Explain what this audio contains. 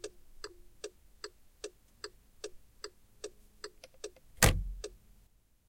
Blinker in car
Car turn signal blinker.
automobile, blinker, blinking, car, drive, driving, traffic, turn